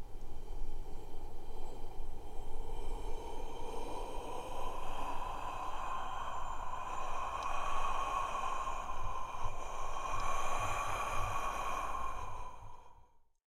wind by human, blowing near the microphone (Behringer B1)